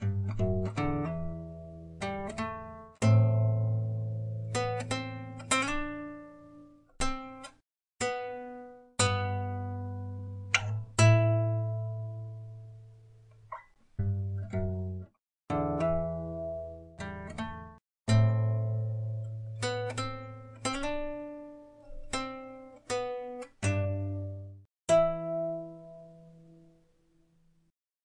mus AccousticCalm
Small acoustic guitar song that loops. Use any way you want.
loops, Acoustic, short, guitar, loop, song